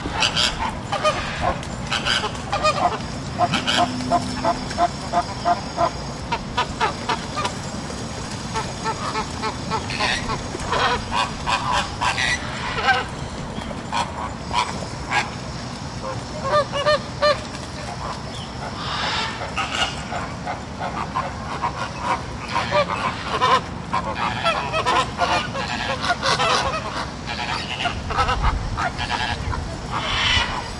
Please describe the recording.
zoo entrance
Atmosphere at the entrance to a zoo. Close-up calls from American and Chilean Flamingos, distant calls from parrots and some construction sounds. Distant voices near the end. Recorded with a Zoom H2.
birds, construction, field-recording, flamingos, parrots, voices, water, zoo